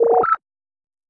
GUI Sound Effects 061
GUI Sound Effects
SFX, Beep, GUI, Sound, Interface, Effects, Design, Game, Menu